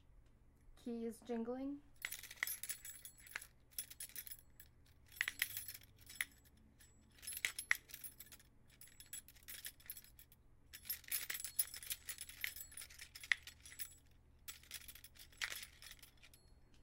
Keys rattling - Could be used as a sound for keys, coins dropping, etc.